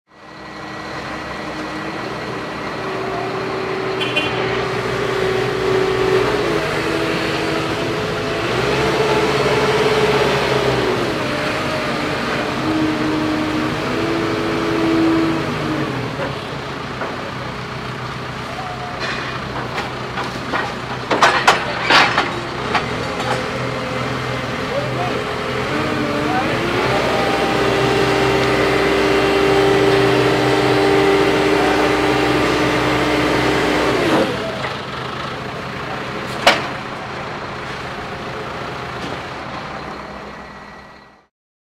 Industrial forklift hydraulics
Recorded on Marantz PMD661 with Rode NTG-2.
The sound of an industrial forklift moving its lifting arm.
building, construction, field-recording, industrial, machine, site